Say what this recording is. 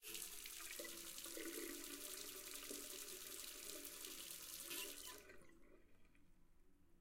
Tap water 1 (Short)
Open water faucet is closed after a few seconds. This was recorded with a Tascam DR 7.
faucet, stream, water